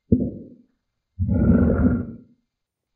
Rock Sound Effect made with porcelain and rough ground.